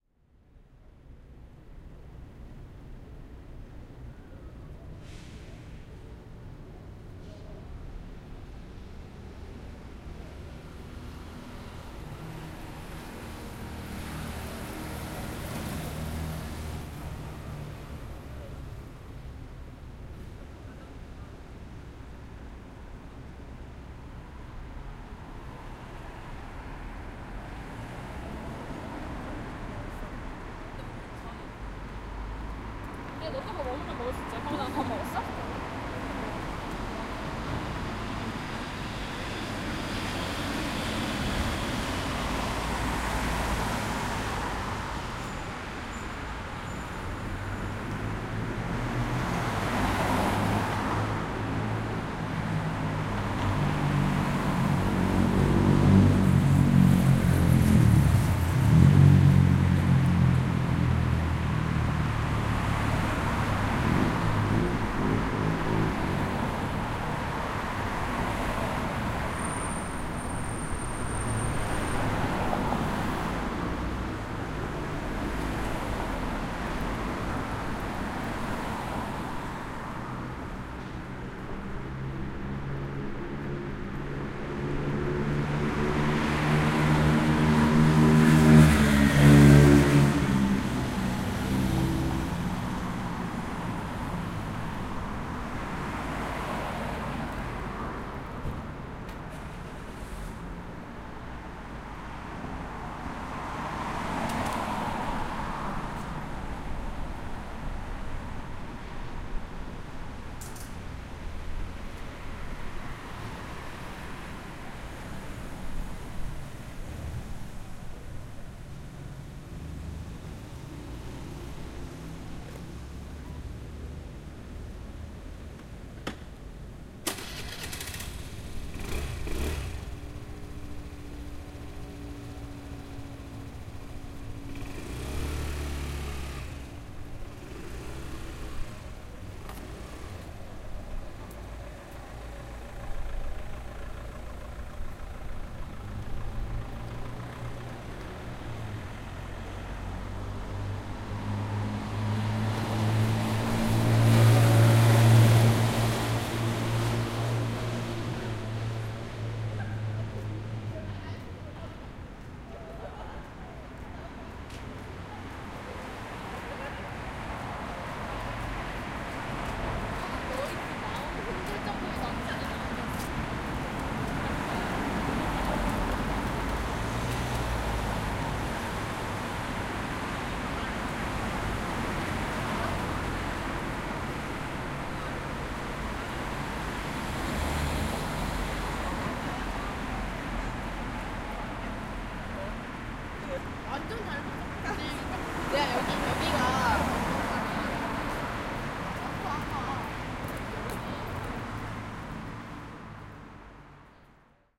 0329 Traffic motorbike engine
Traffic in a road in Naebang. Medium low traffic. Some people walking and talking. Motorbike engine on.
20120624
car, engine, field-recording, korea, motorbike, seoul, traffic